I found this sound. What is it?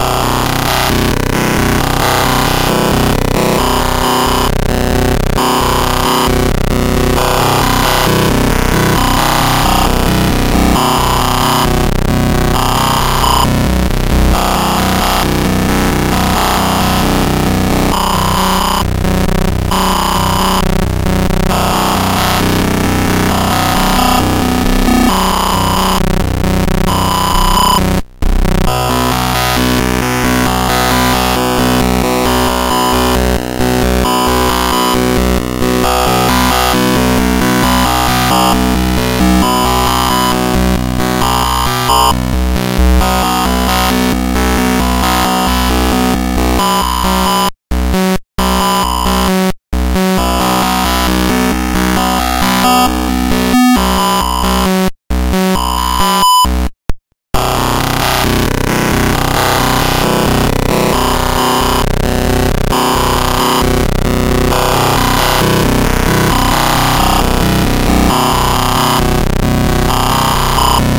scrzt 33iix1ti
Evolving sound made with glitch machine on iphone get RPN code from iphone/ipod/ipad: